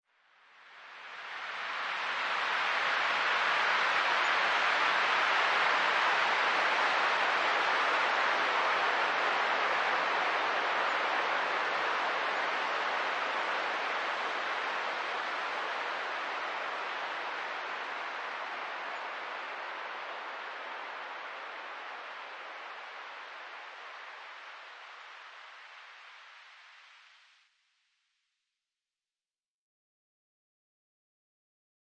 Long Noisy Woosh v2